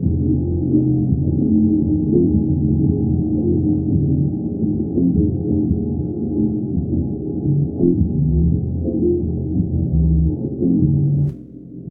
cloudcycle-cloudmammut.02
space, drone, divine, ambient, evolving